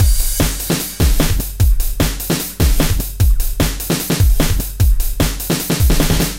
duppyBigBeat01 150bpm
Loud breakbeat style hard loop with four variations. Acoustic drum sounds.